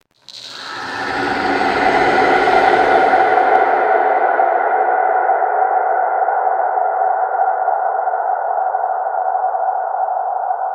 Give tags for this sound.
atmosphere; dramatic; horror; ice; icy; sinister; spooky; sting; stinger; suspense

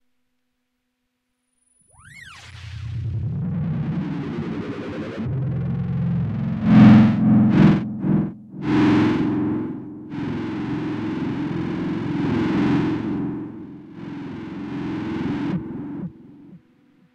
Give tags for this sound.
fm; artificial; dark; sample; volca; robotic

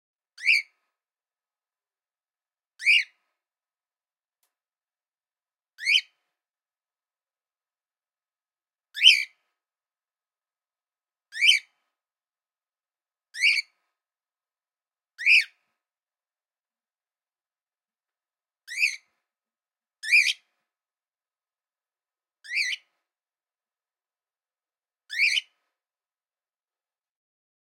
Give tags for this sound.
birds; birdsong; field-recording

